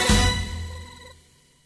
Selecting right answer - speed 2